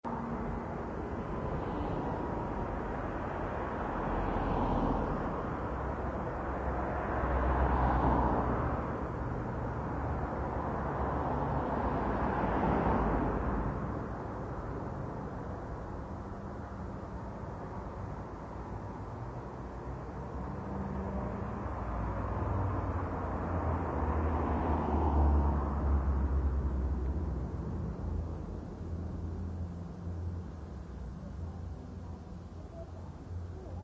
Road ambiance - Passing cars
traffic, cars, ambiance, city, town, atmosphere, passing, road, pass, street